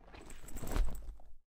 A combination of different sounds, making a sound of a backpack being put on or taken off.